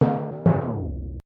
A short Timpani sting made with Logic Pro X.
sting, bass, music, drum, silly, short, transition, musical, comedic, cartoon, comical, funny, stab, melodic